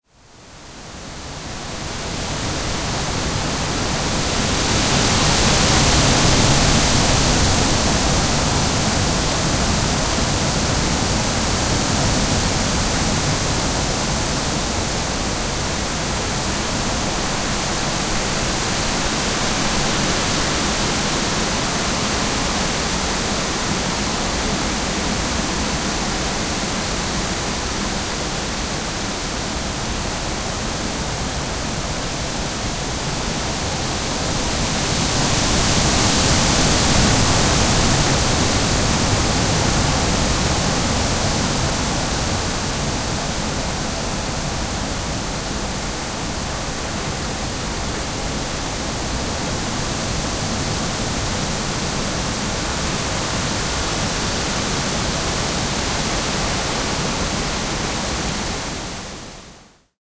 Extended recording of a windy day.
nature, wind, ambient, weather, environmental